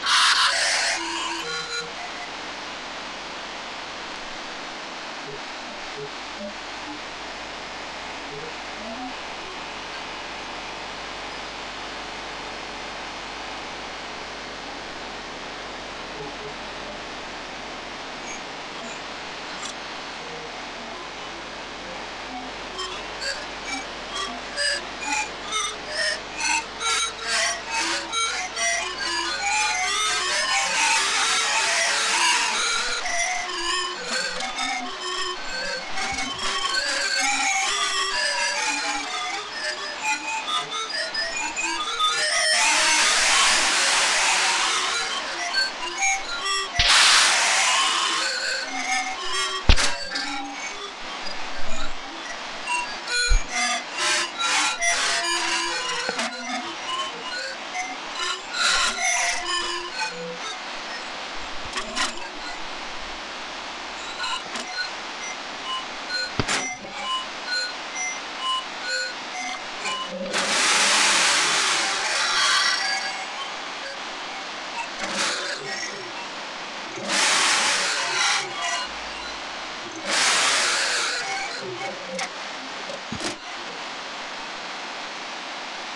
A cheap webmic provides input to an effect chain. Can't be precise as to exactly what was on the effect chain, but seems to have contained a distortion plugin (possibly an amp simulator) and a pitch-changing plugin (most likely a granular pitch changing plugin) and a delay.
Any sound fed to the chain enters the feedback loop and generates a number of mutated copies with different pitch. Sometimes these form sequences of quasi-mellodies, sometimes different pitches interact with each other and "chords" appear.
Recording dated Sep 2010.